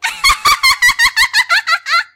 WARNING: might be loud
another laugh for minkie pie
laugh, manic, minkie, obsidian